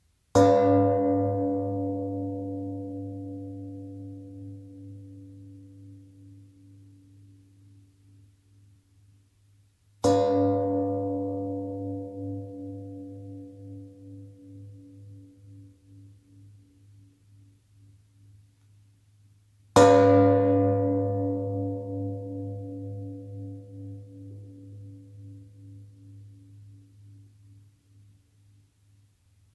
knock pot cover heavy
test my recorder by cellphone and pot cover
bang, hit, kitchen, lid, metal, pot, stainless, steel